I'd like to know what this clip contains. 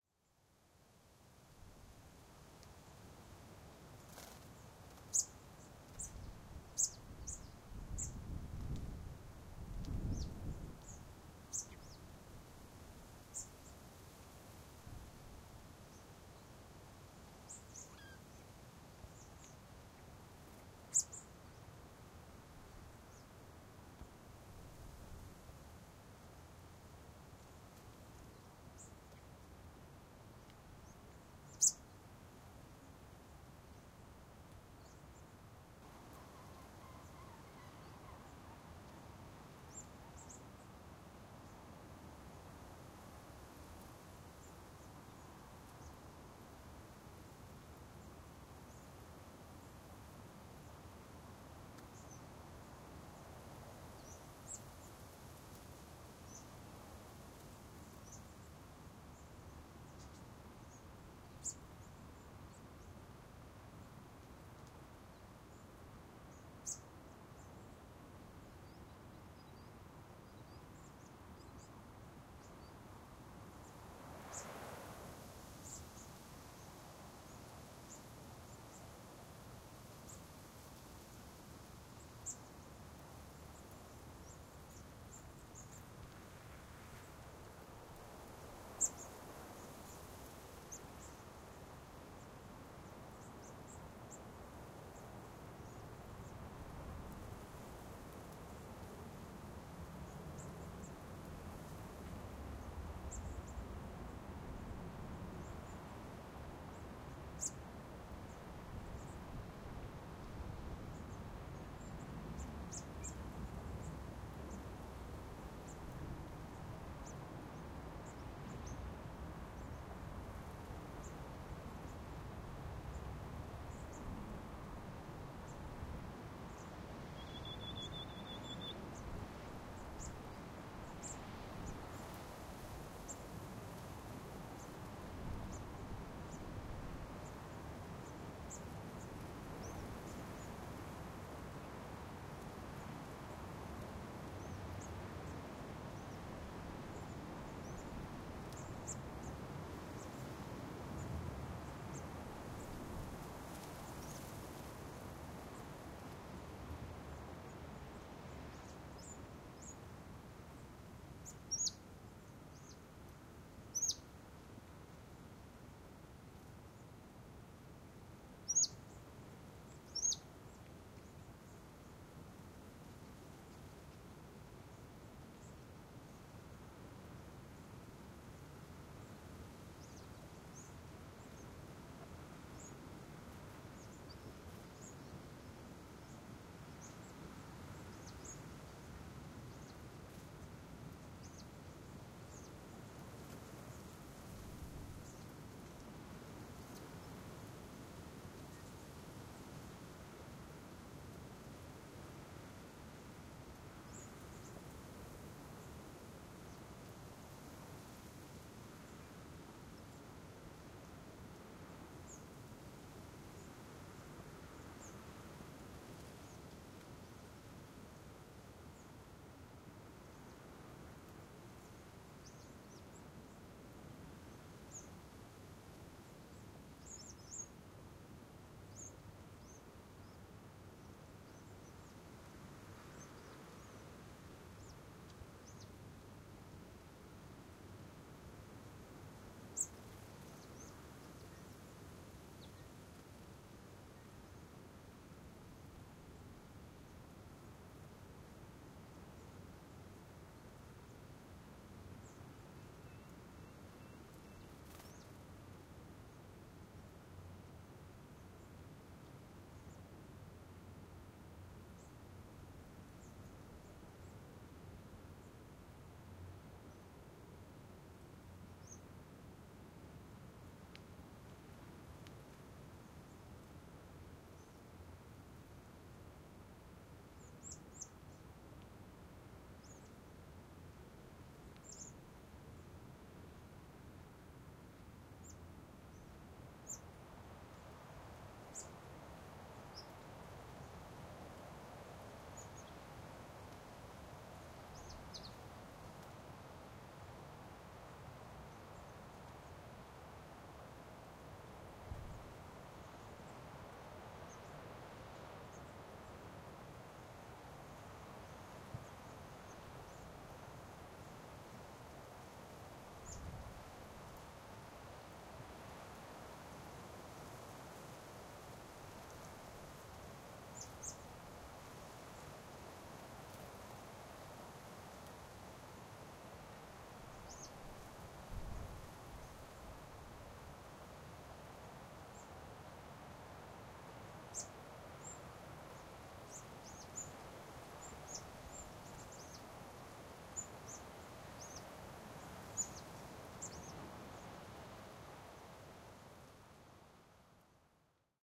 2011-06-06 Birds and breeze, Royal National Park, NSW, Australia
Recorded on 6th June 2011 on a headland in the Royal National Park, NSW, Australia with the inbuilt uni-directional mics on a TASCAM DR-100.
Small birds and rustling leaves can be heard in the foreground. There is a constant breeze, and you can also hear the waves breaking off the nearby cliff.
Australia, waves, breeze, rustling, distant, wind, birds, Wales, field-recording, New, South, National, Park